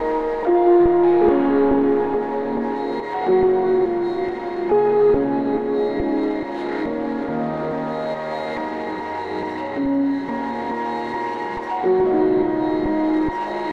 Ambient Piano Loop 54 (Ambiance)-(140 BPM)
Ambiance, atmosphere, effect, electronic, Enveloped, hop, Loop, Looping, Modulated, music, Piano, sample, sound, Sound-Design, stab, stabs, Synth, trip